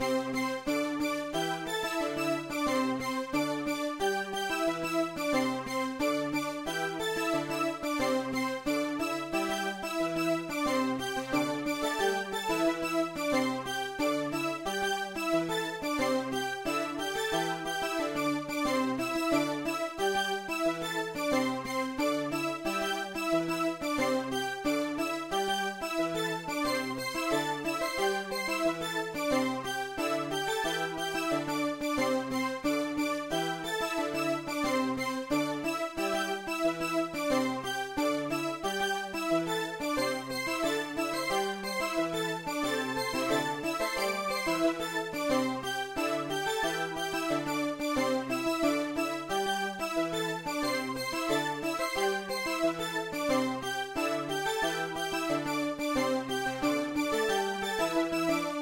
Useful for 2d pixel castle or church environment.
Thank you for the effort.